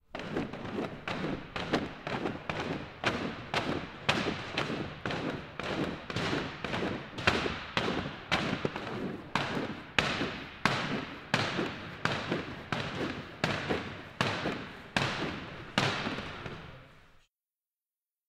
Rope Lano 2
Moving the rope on the wooden floor
floor, gym, lano, Rope, wooden